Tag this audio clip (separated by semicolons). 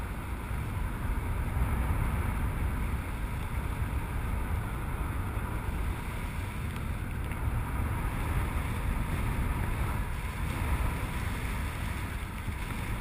Parachute; Paragliding; Wind